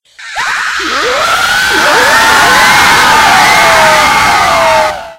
Pitch altered echoes of three different recordings of my voice.
shriek, terror, charge, legion, banshee, horror, shrill, game, echo, demon, piercing, multi, vocal, voice, RPG, horde